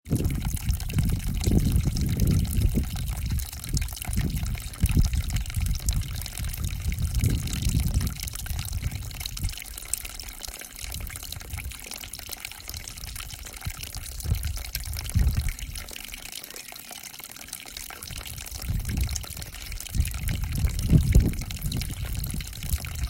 Water trickle
a little fountain i found on the camino de santiago. recording with my phone mic.
ambience, ambient, babbling, brook, bubbling, creek, daytime, drip, field-recording, flow, flowing, forest, gurgle, gurgling, liquid, meditation, meditative, nature, relaxation, relaxing, river, shallow, splash, stream, trickle, trickling, water, woodlands, woods